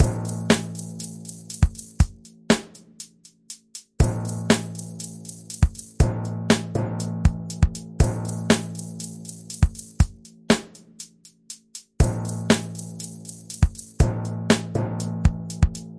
Electronic Native Instruments Timpani drum.
Instruments, Timpani, drum, Native